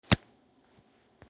Clicking LEGO Brick
The sound of 2 Lego Bricks locking on to eachother.